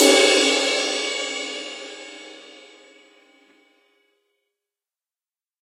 ZildjianKCustom20MediumRideCymbalEdge
Zildjian K Custom 20 Inch Medium ride cymbal sampled using stereo PZM overhead mics. The bow and wash samples are meant to be layered to provide different velocity strokes.